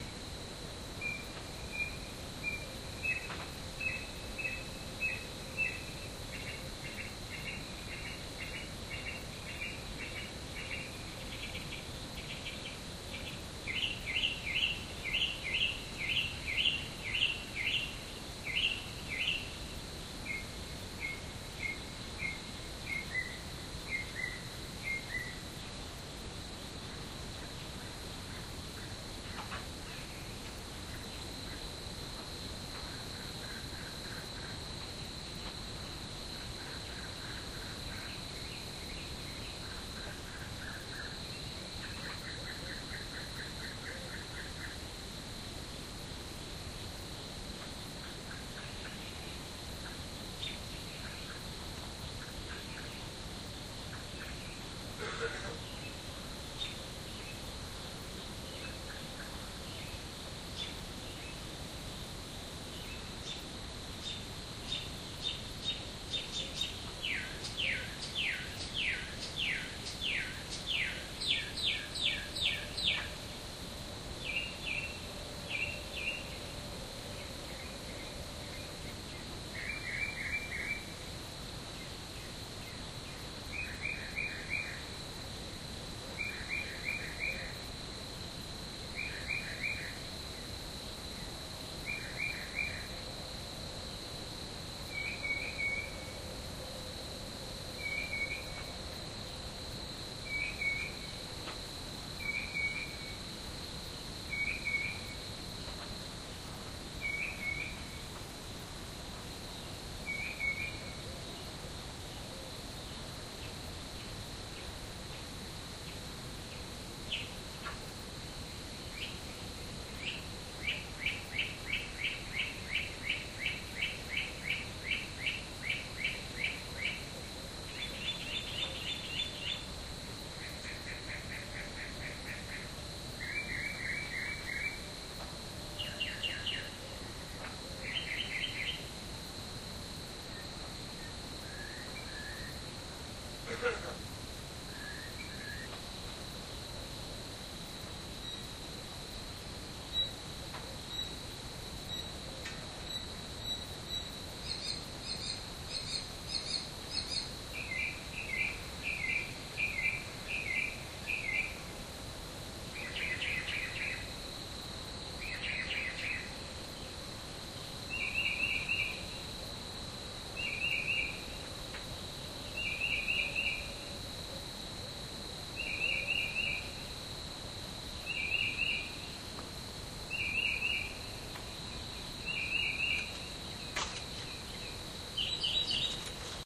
A Mocking bird that has his days turned around. I recorded this at 3:30 am. He thinks the night light is the sun, soooo he chirps ALL FREAKIN' NIGHT! lol
bird, mocking